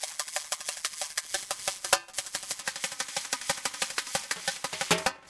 dub drums 015 overdrivedspacebrushes snare
up in space, echomania, crunchy